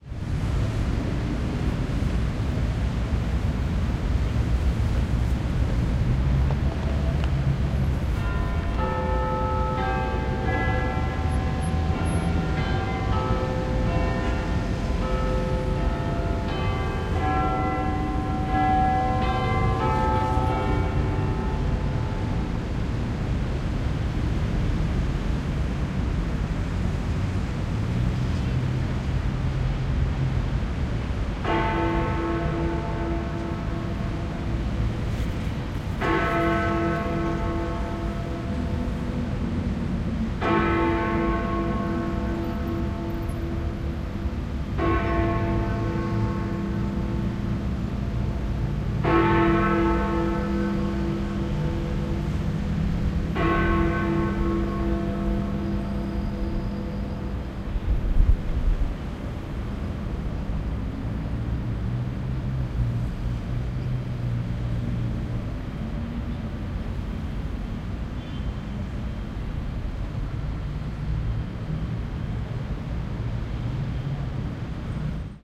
Big Ben at 6
London's Big Ben at six o'clock recorded from Parliament Square. Lots of traffic around, so the bell is not really sticking out. Recorded with Zoom H4 on-board mikes